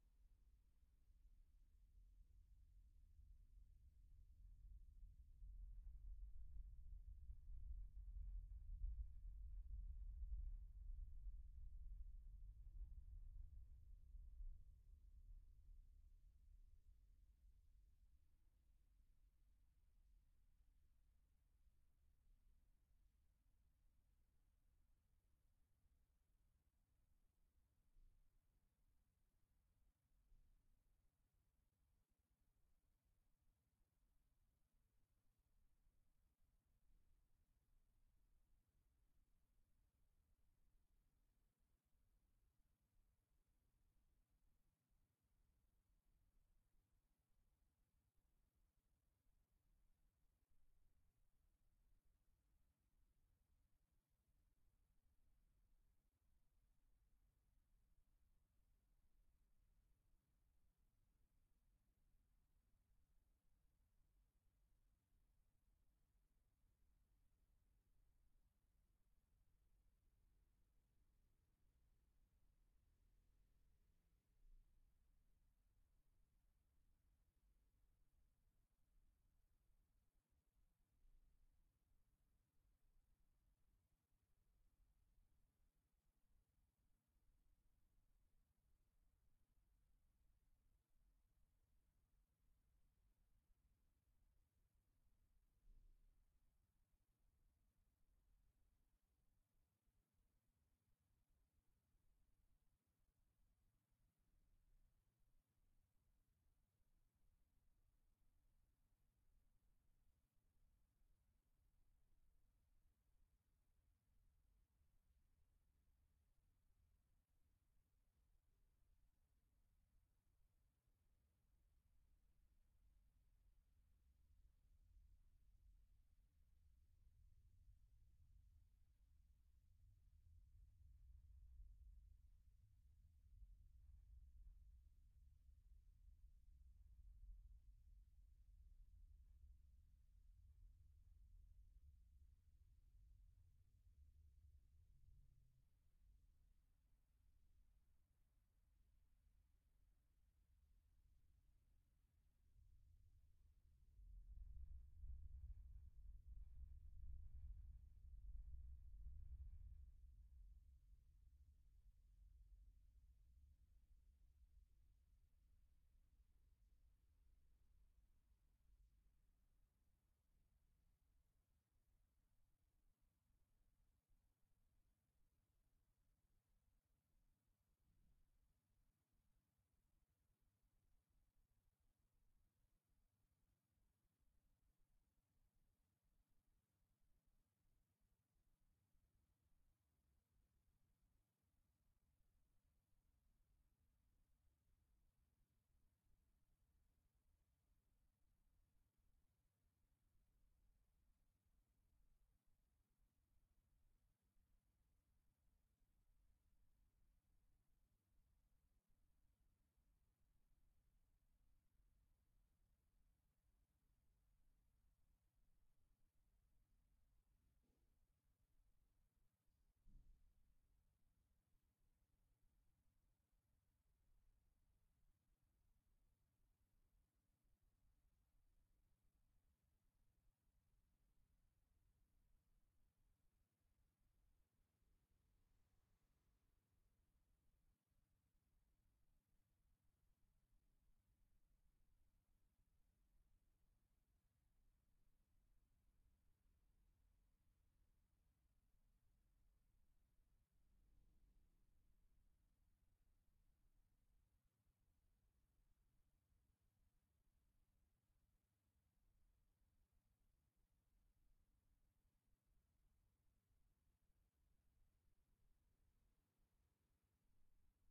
room tone floor -1 house tram vibration door
Sound in my cellar where we can perceive a little pipe noise, when the tramway pass it make some door vibrate et make these particular sound.